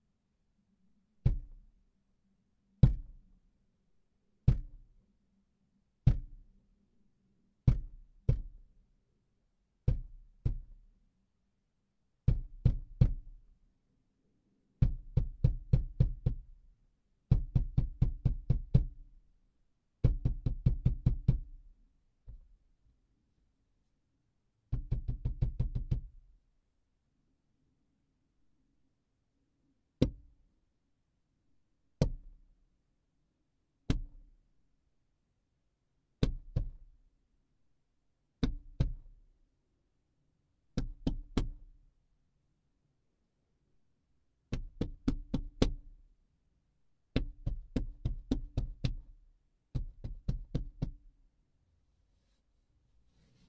Klopfen Autoscheibe
Knocking on a Car Window
Car, Window